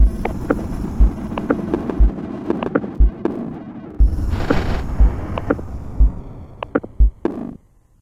A friend Freesounder launched a dare asking people to make drum loops using samples from one of his packs:
The original samples were very short and I was more in the mood for making ambient-ish like sounds so, whith his permission, I processed the original sounds to create long sounds more suited to my purposes.
This loop contains several layers. Slight bitrate reduction used on one of the layers, also reverb and a pitch correction VST.
In this loop I have used several modified versions of each of the following sounds:
For more details on how the modified sounds were created see pack description.